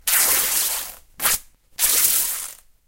tearing a cloth02
incident, tear, cloth, horror, clothes, tearing